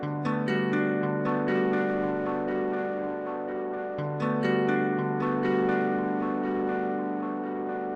A guitar through a pitch shifter and echo. It should loop seamlessly if you're into that.